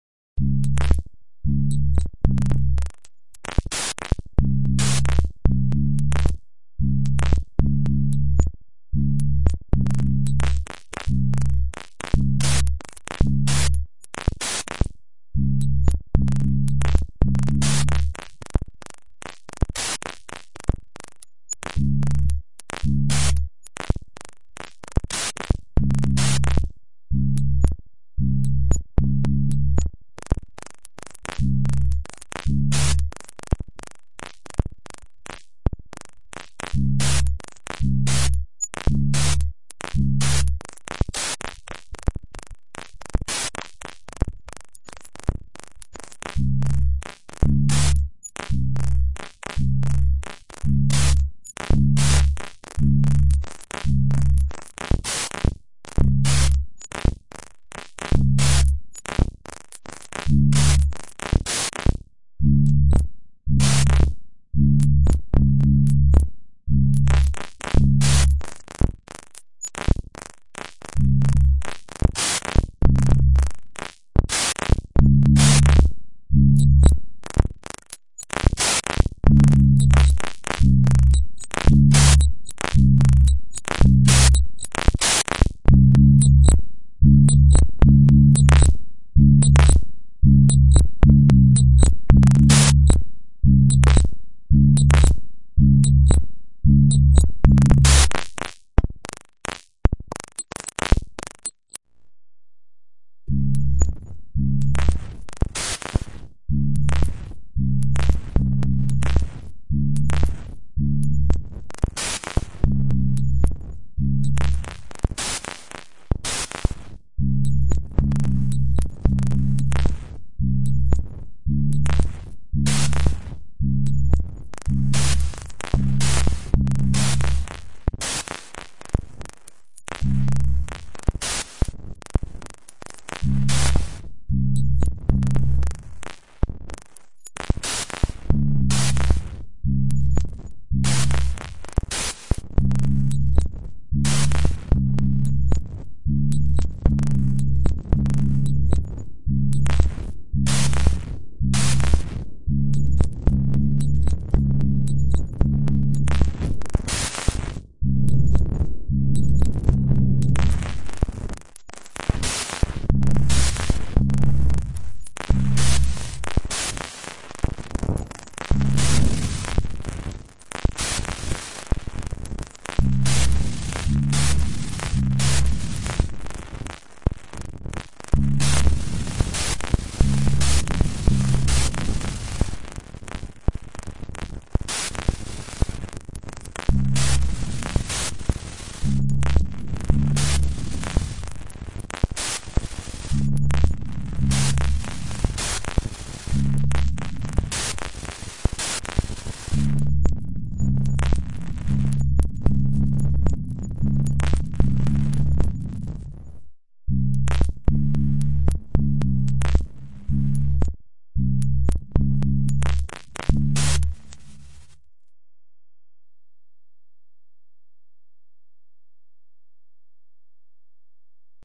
Sound experimentation with a generator of random rhythms, according to a stochastic model : a simple "Markov model".